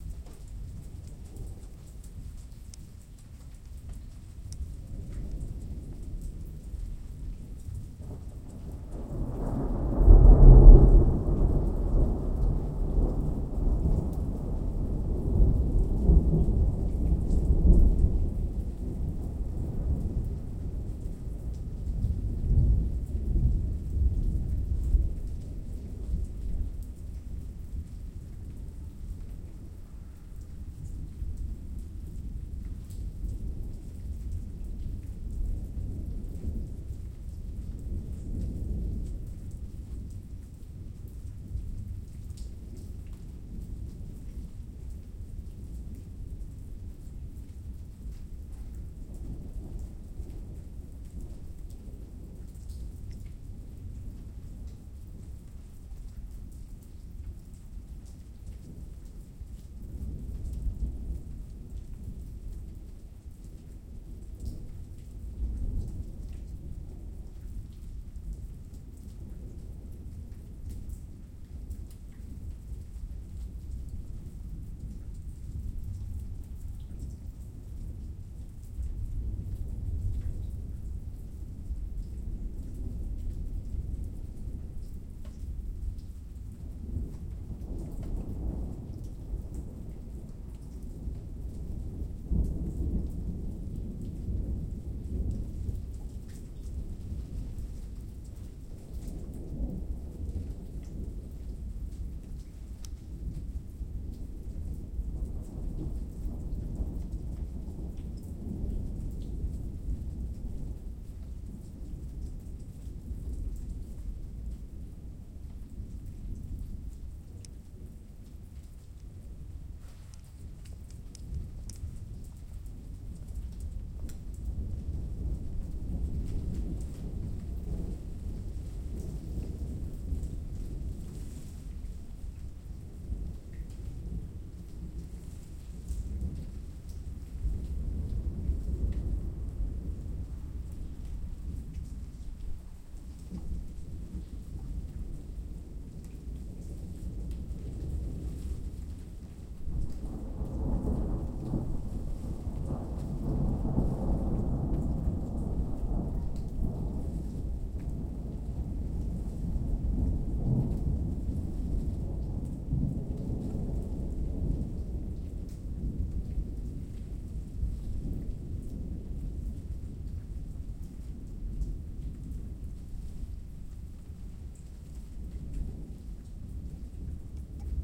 distant storm 2
Distant thunder storm. Gentle rain and non-stop thunders. Recorded on Marantz PMD 661 MKII built in stereo mics.